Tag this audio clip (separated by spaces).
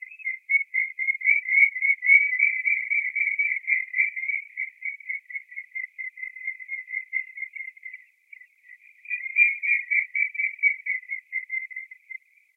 duck filtered transformation